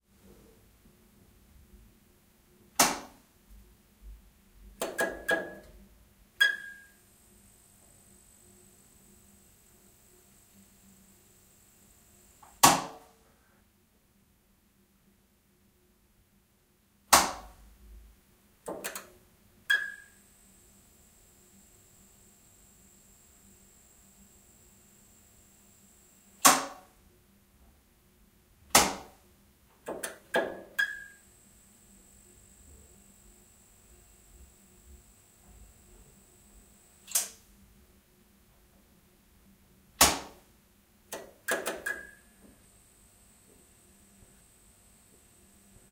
Flourescent Lamp small bathroom 02
Switching a flourescent lamp on and off in a small bathroom.
Rocorded with a ZOOM H6 with XY Mics (90°). Less Reverberant.
Bathroom, Bulb, Buzz, Flourescent, Hum, Lamp, Small, Switch